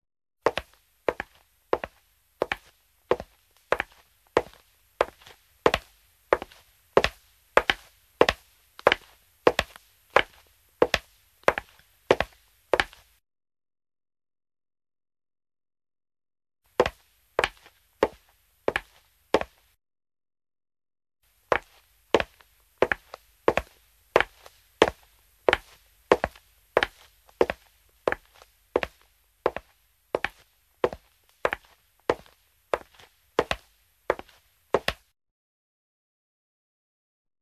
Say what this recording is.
footstep girl
A girl walk
girl, people, footstep